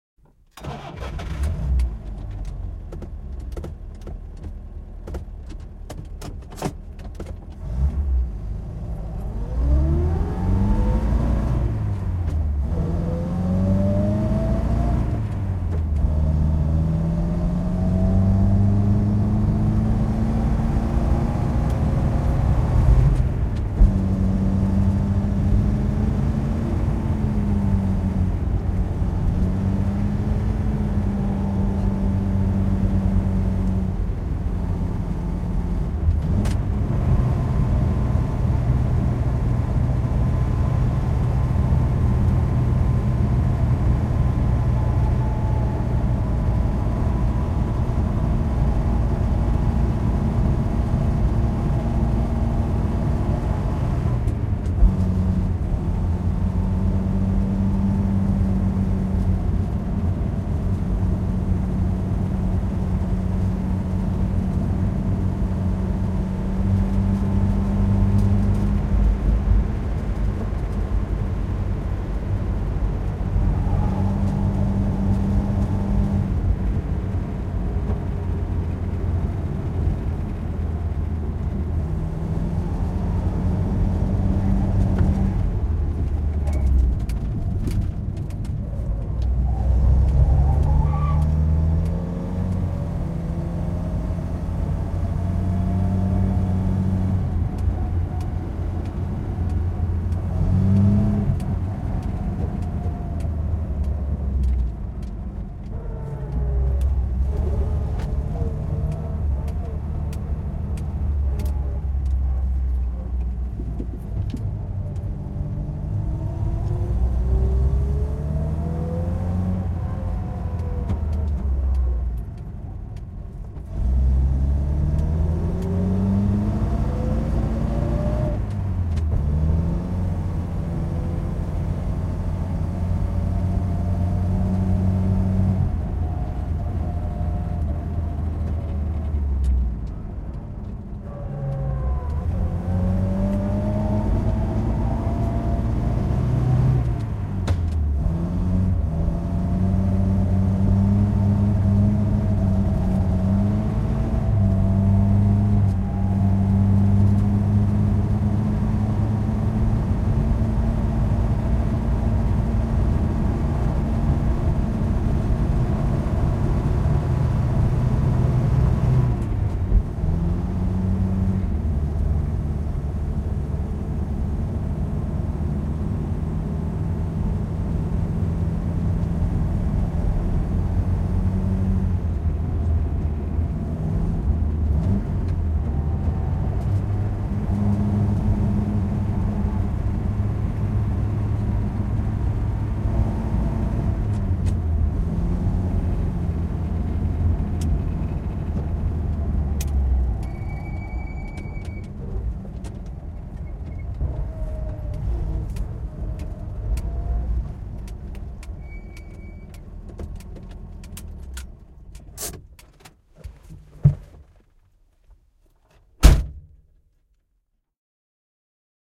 Henkilöauto, ajoa, Moskvitsh / A car, start, driving on asphalt on a winding road, changes, slow down, engine shut down, car door, interior, Moskvitsh, a 1962 model
Moskvitsh, vm 1962, mosse. Käynnistys ja ajoa mutkaisella asfalttitiellä, vaihtamisia, pysähdys, moottori sammuu, käsijarru, ovi auki ja kiinni. Sisä.
Paikka/Place: Suomi / Finland / Lohja
Aika/Date: 01.08.1996